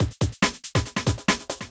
A dnb beat for any Dnb production, use with the others in my "Misc Beat Pack" to create a speed up. To do this arrange them in order in your DAW, like this: 1,2,3,4,5,6,7,8, etc
Drums, DNB